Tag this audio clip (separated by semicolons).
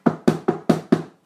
knocking; knocks; knock